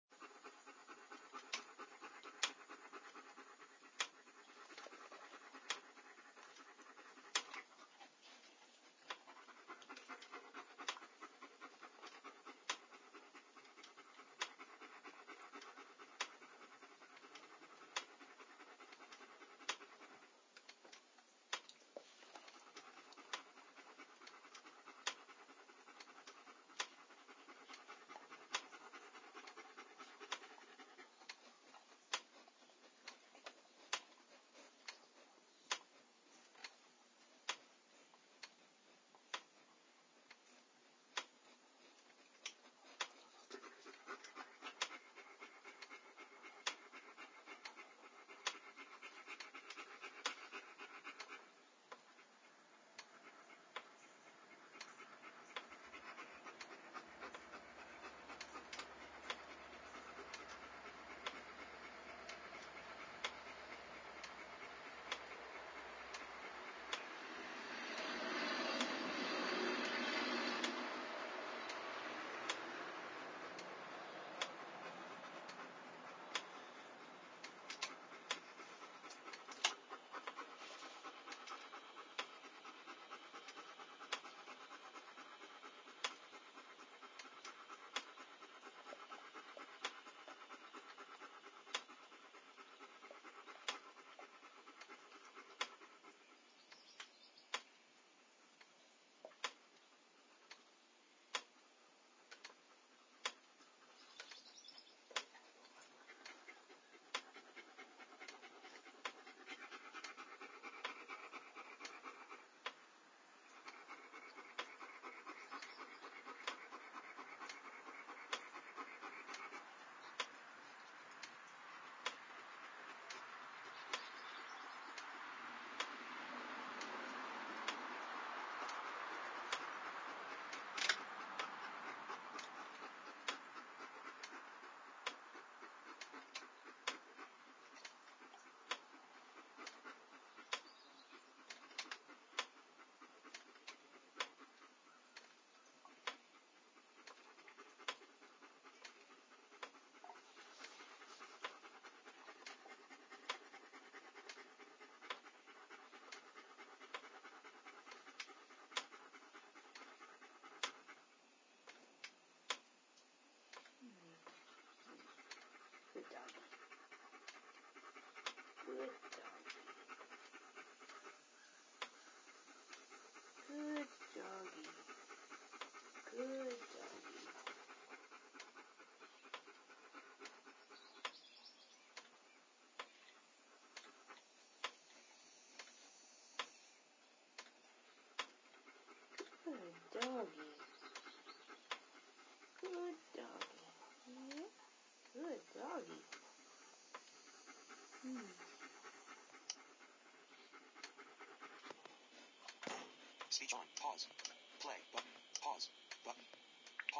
This is a recording of my big German Shepherd puppy panting. You will hear some car sounds from across the street. I am sitting on my porch at the time of this recording. At the end you will probably hear me say, "good doggie."